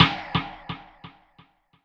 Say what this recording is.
delayed band drum